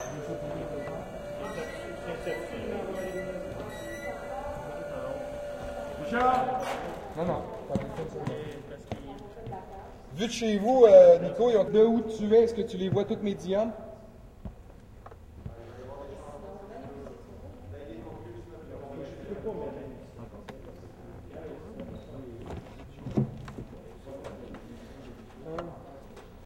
theater crew stage setup voices quebecois and mandarin2
crew, mandarin, quebecois, setup, stage, theater, voices